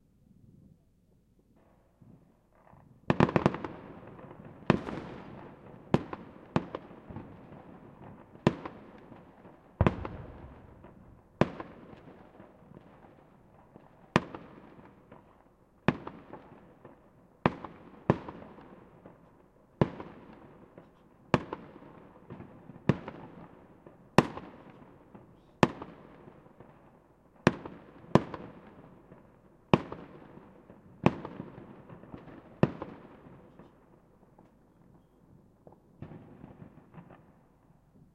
New year fireworks
background, year